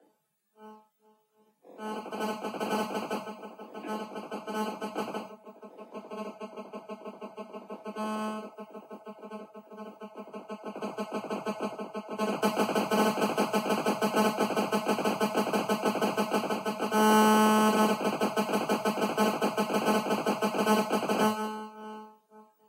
This is the sound you here on TV and on your own computer sometime when you cell tower pings you or someone calls. Processed in cool edit from the raw file "interference".
buzz, phone, radio